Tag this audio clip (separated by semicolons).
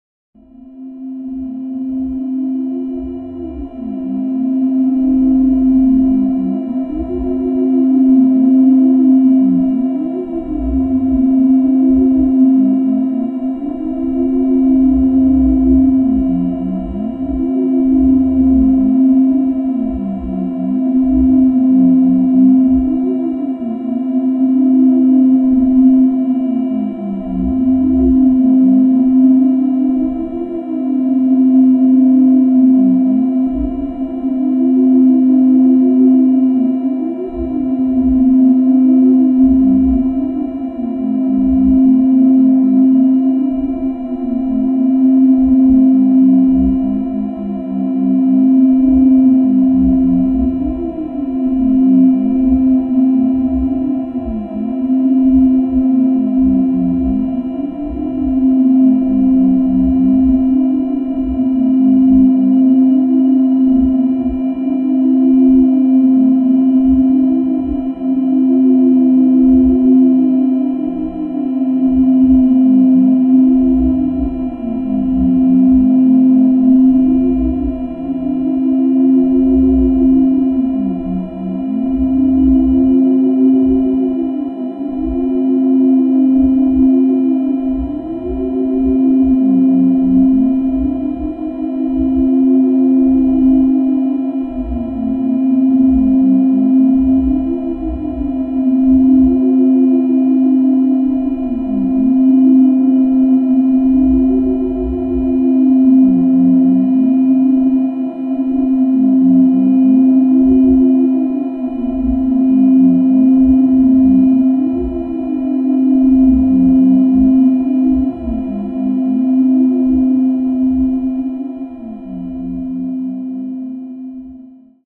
Halloween; synthesizer; experimental; atmosphere; drone; radiophonic; haunted; sci-fi; space; 60s; 50s; sinister; 70s; spooky; weird; confusion; terrifying; groovebox; fx; terror; science-fiction; horror; creepy; dark